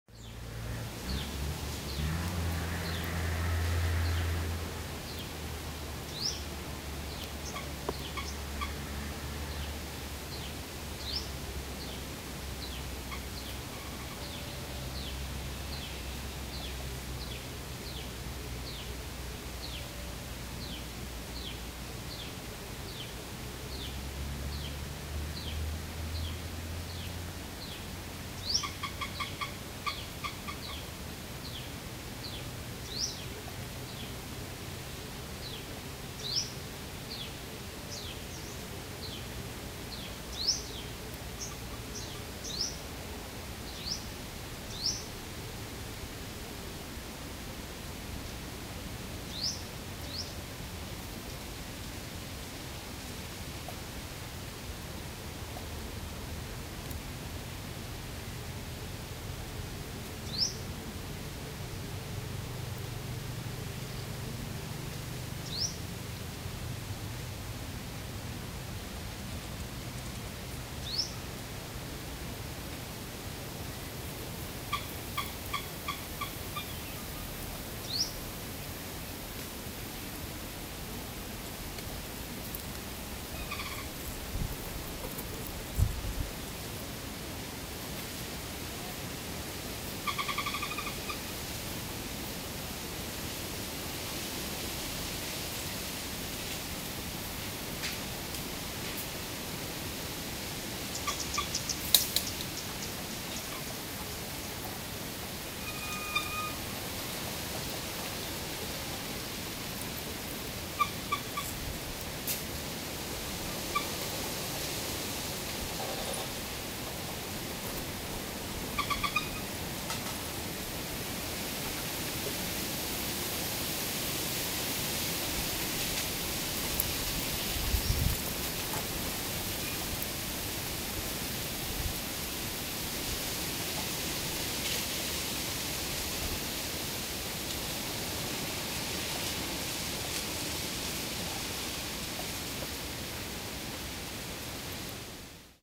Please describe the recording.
Thailand countryside at 12PM, recorded with DBX RTA-M microphone.